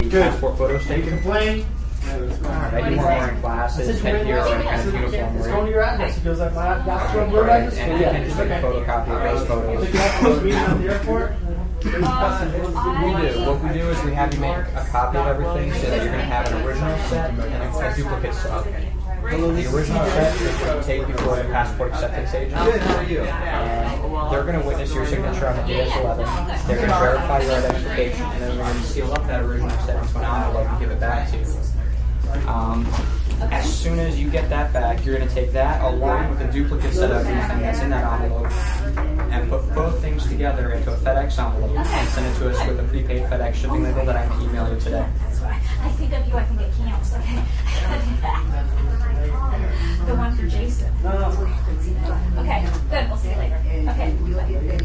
SonyECMDS70PWS office2
Sounds of a small office recorded with Olympus DS-40 with Sony ECMDS70P.